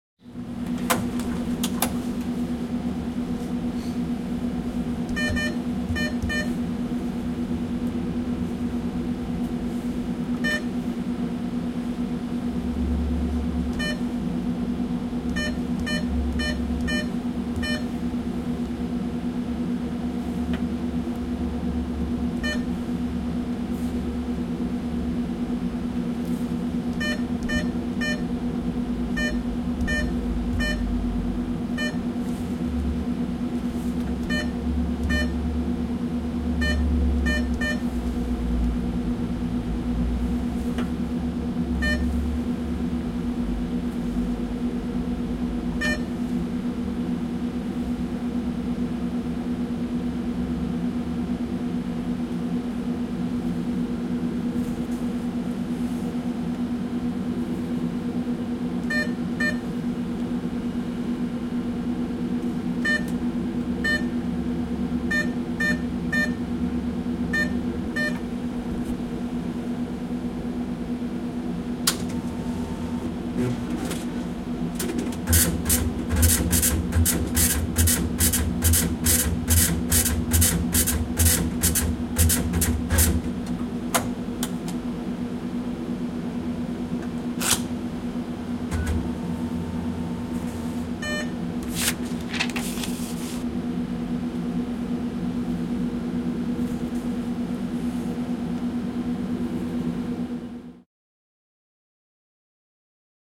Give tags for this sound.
piipitys; printing-receipt; paying; finnish-broadcasting-company; yleisradio; beeping; maksaminen; loksahduksia; kuitin-tulostus; soundfx; ATM; pankkiautomaatti; field-recording; automated-teller-machine

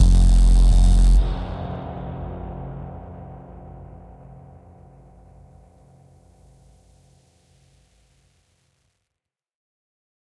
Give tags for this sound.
effect; effects; electronica; music; one; piano; production; sample; short; shot; single; Smooth; synth

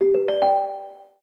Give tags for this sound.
melody; event; bloop; videogame; indie-game; alert; cell; sfx; notification; click; application; correct; incorrect; tone; game; ringtone; desktop; harmony; effect; noise; tones; sound; music; ambient; computer; blip; chime; bleep

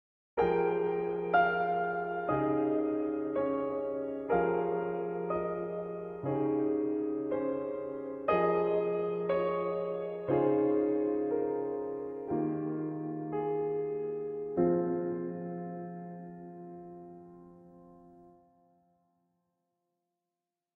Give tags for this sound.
farewell; loss; melancholy; good-bye

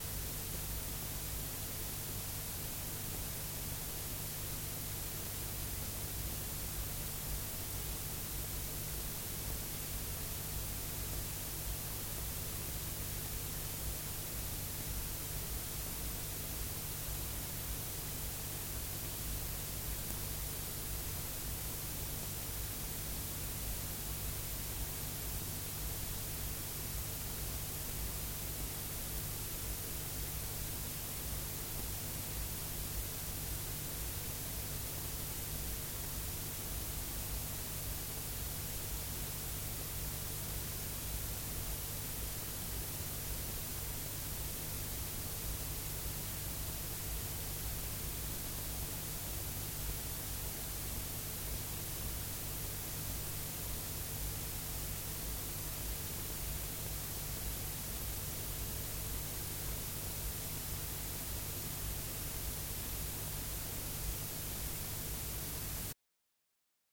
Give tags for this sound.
hum; ground; cassette; hiss; cassette-tape; tape; noise; static

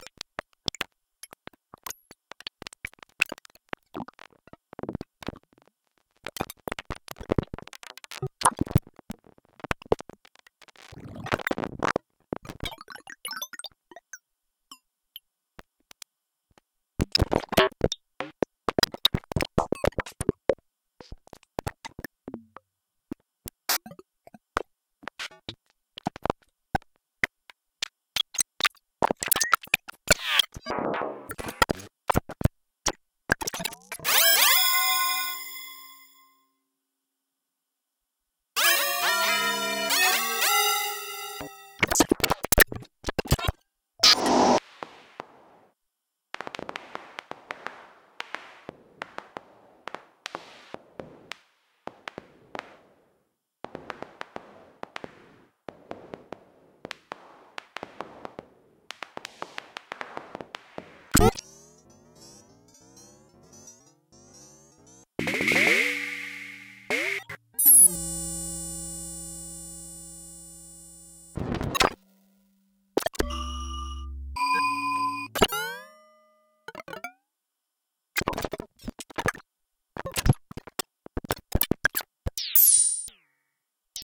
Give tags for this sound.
mgreel; micro-percussion; morphagene; percussion; plonk

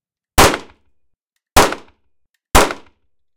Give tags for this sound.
shot; pistol; gun; gunshot

